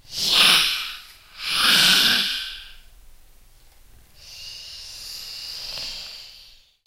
snakey woman
snakey, serpant, spit, hiss, breath, angry, evil